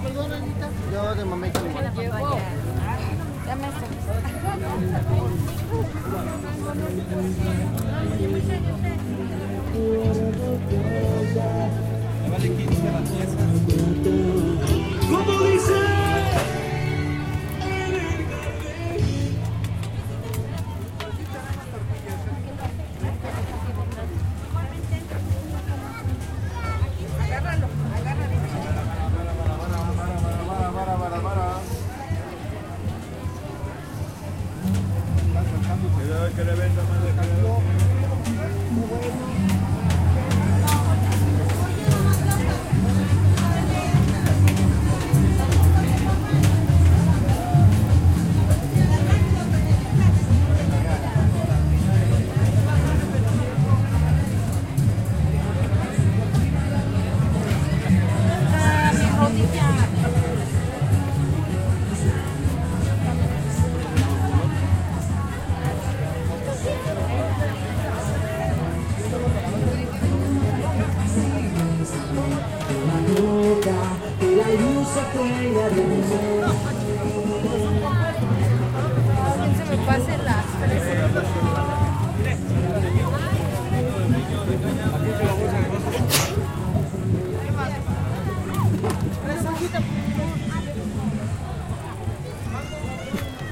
Caminando en Tianguis 2
Walking through the Tianguis (Market) in méxico city. Salesman.
atmosphere, market